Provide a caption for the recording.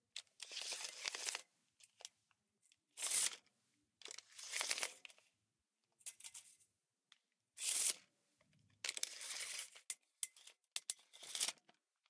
Plastic Blinds
Opening, rustling and closing of a window blind
uam, blinds, 5naudio17